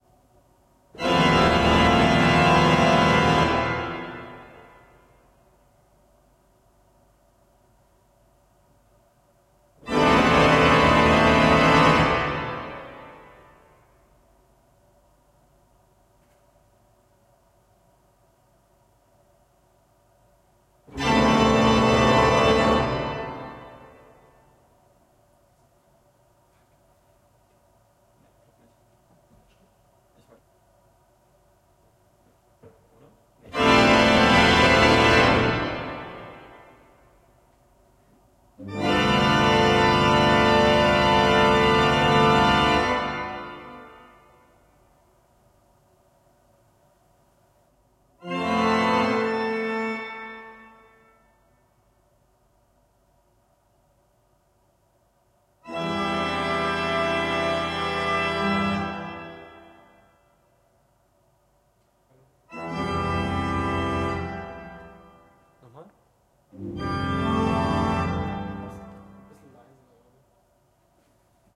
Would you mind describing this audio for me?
we just randomly pressed muliple keys at the same time, big european church organ